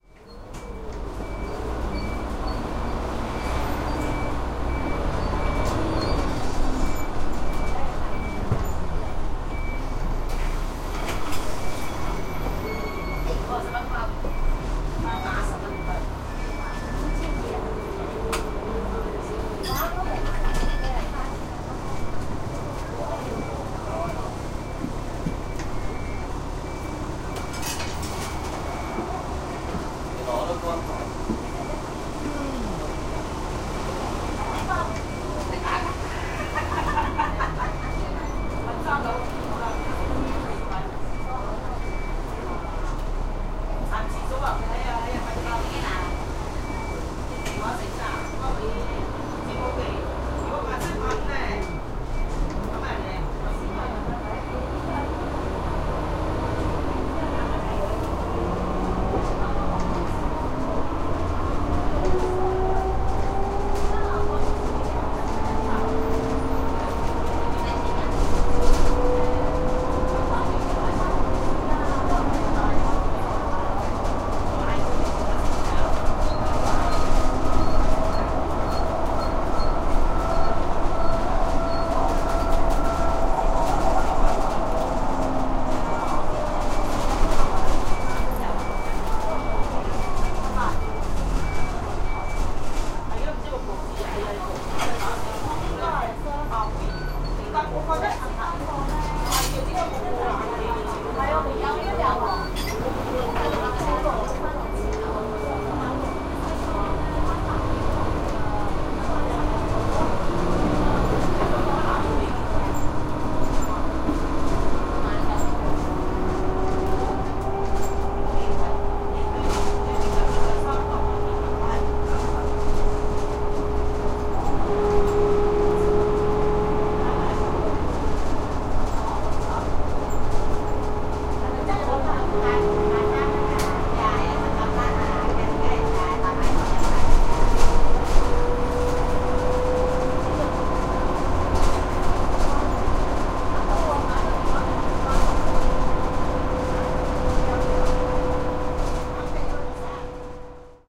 Bus Interior
Stereo recording of the ambience inside a bus. People are chatting. There are also the sound of the auto-pay system called Octopus, and the insertion of coins when people are boarding the bus. Recorded on iPod Touch 2nd generation with Alesis ProTrack.
bus, hong-kong, interior